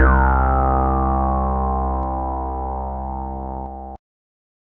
Sample00 (Acid303 1-3-5-6)
A acid one-shot sound sample created by remixing the sounds of
303, synth, one-shot, tb, acid